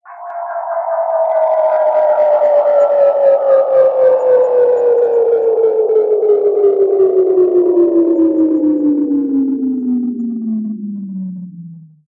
spaceship power down
Slow space ship engine power down sound effect, game sound effect